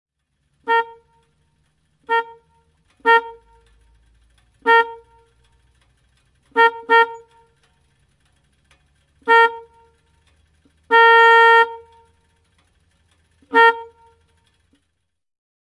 Harley Davidson 1340 cm3, vm 1986, harrikka. Äänimerkkejä, erilaisia.
Paikka/Place: Suomi / Finland / Lohja
Aika/Date: 31.07.1991